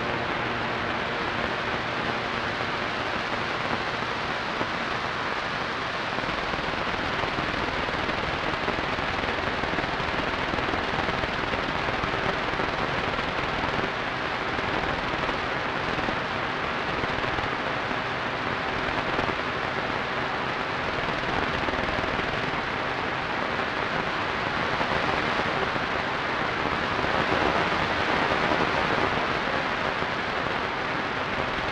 Radio Static Short Wave choppy
Some radio static, may be useful to someone, somewhere :) Recording chain Sangean ATS-808 - Edirol R09HR